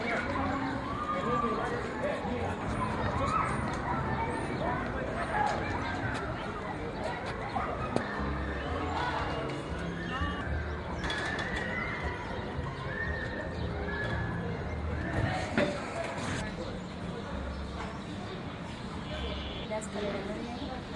Paris Public Garden
Paris, a public garden
Public, Garden, Paris, City, Pedestrians, Road, Street, Ambiance